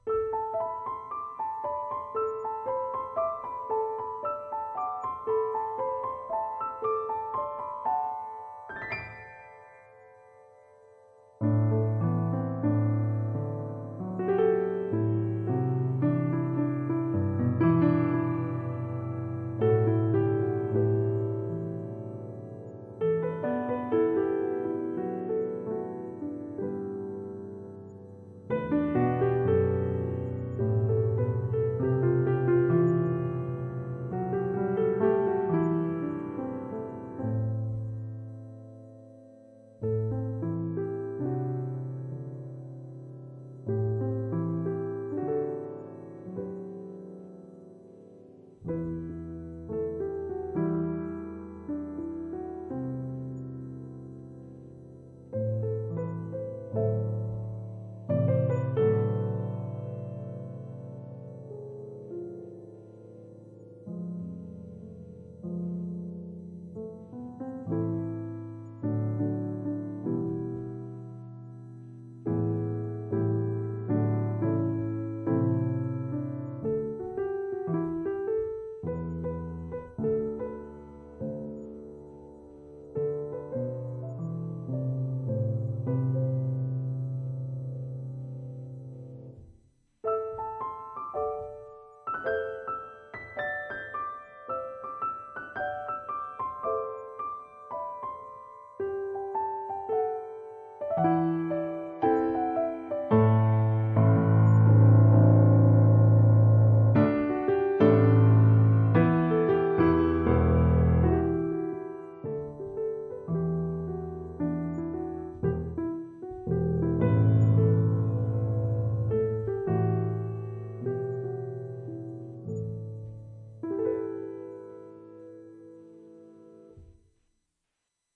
Afternoon (Ambient Piano Solo)
Another solo piano. This one can be used in a variety of ways. I think of it representing a warm, tranquilizing afternoon.